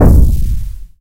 flak hit
White noise manipulated until it sounds like a dull, thumping explosion
explosion, explode, bomb